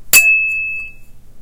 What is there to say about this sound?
Dropped and threw some 3.5" hard disk platters in various ways.
Ting and long ring out
clack, metallic, ring, ting